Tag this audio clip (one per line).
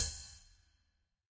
hard
ride